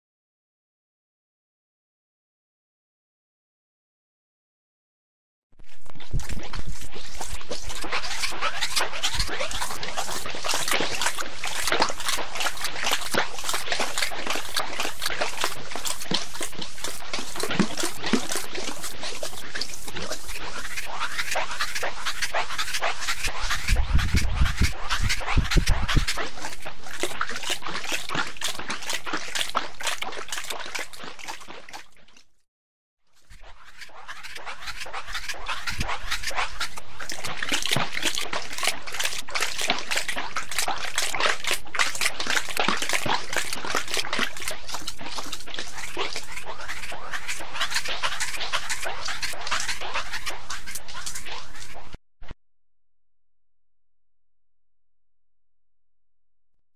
Drink Shuffle
This is a digital field recording of my bulldogge drinking and it was editing with GRM tools, creating a Warp effect. I'd like to hear it.
drink, bulldog, dog, GRM-tools, bulldogge